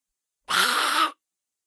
Goblin Death
A goblin meeting a swift death.
scream, goblin, death, screech